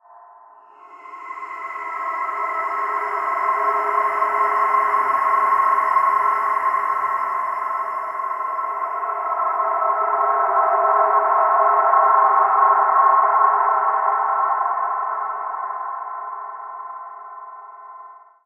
LAYERS 004 - 2 Phase Space Explorer C5
LAYERS 004 - 2 Phase Space Explorer is an extensive multisample package containing 73 samples covering C0 till C6. The key name is included in the sample name. The sound of 2 Phase Space Explorer is all in the name: an intergalactic space soundscape. It was created using Kontakt 3 within Cubase and a lot of convolution.
artificial, drone, soundscape, space